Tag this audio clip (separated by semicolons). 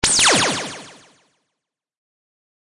audio; effect; electronic; freaky; gameaudio; gameover; gamesound; gun; sfx; shooting; sound-design; sounddesign; soundeffect; weapon